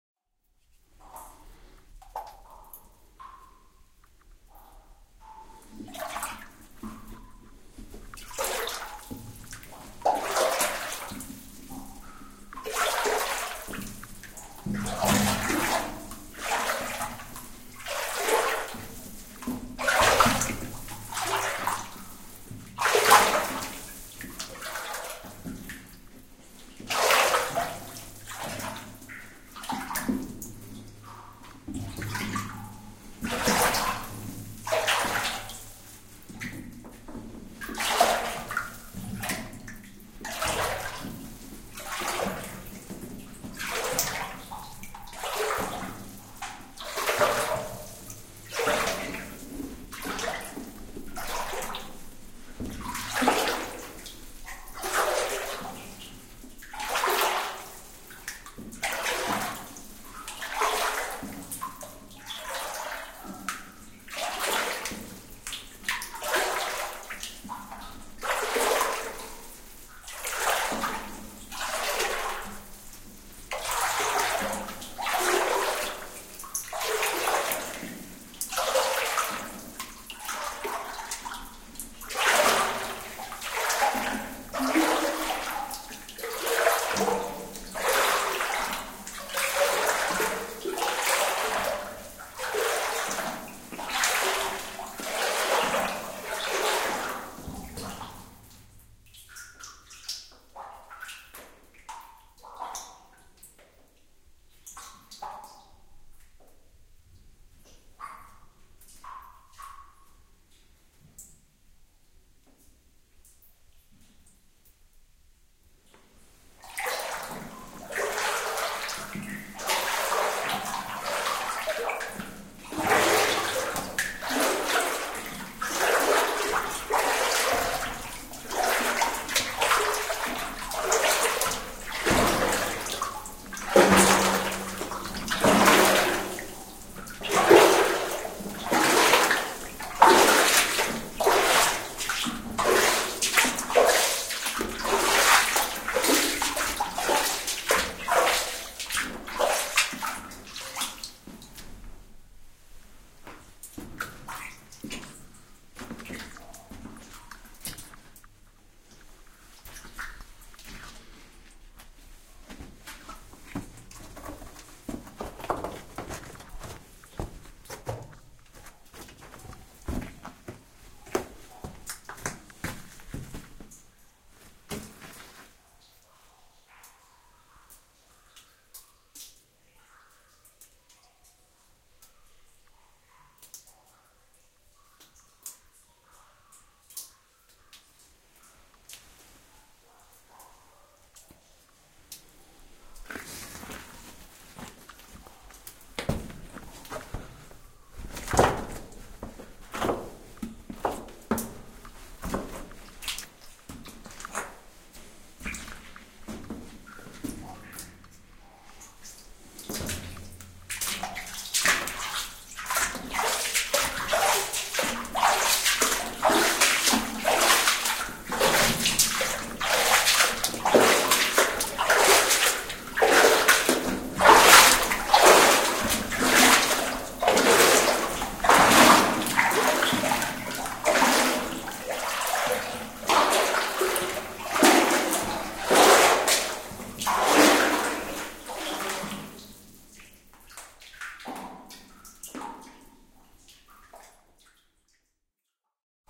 Walking In A Flooded Mine
A stereo field-recording of wading into a partially flooded mine.Sony PCM-M10